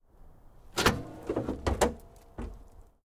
Car Hood-Open metalic-hollow-springy
Car door latch open, hollow springy sound of hinges